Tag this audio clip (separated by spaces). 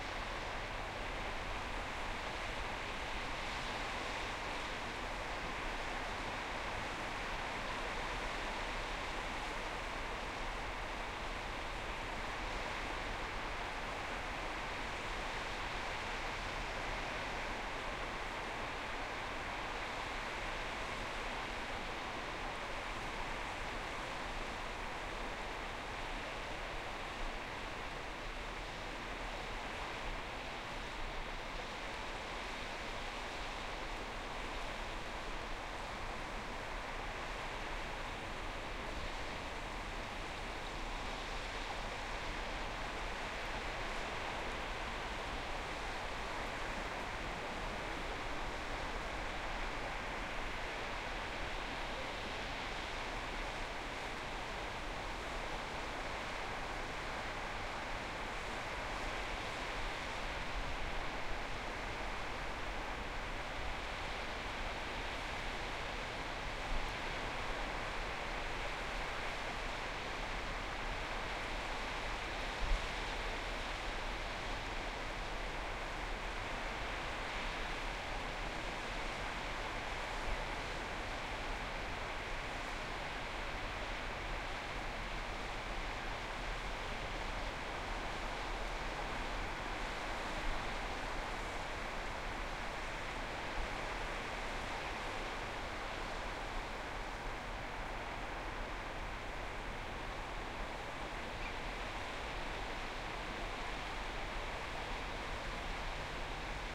field-recording denmark